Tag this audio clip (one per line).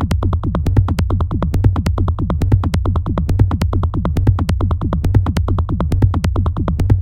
techno
loop